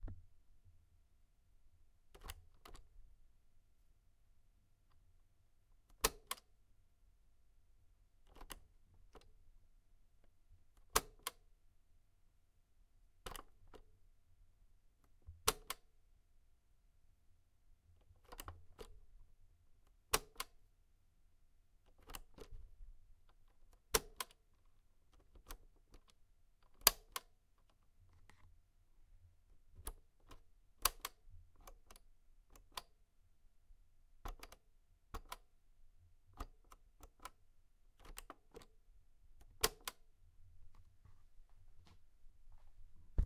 Tape deck mechanical sounds zoom4295
deck, sounds